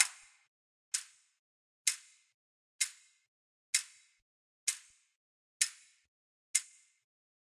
Clock 128 bpm quarter speed
128, clock, tick, ticking, tic-tac, time, timepiece